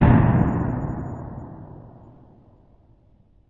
Heavy drop
SFX. Sounds like hit or drop.
drop, hit, echo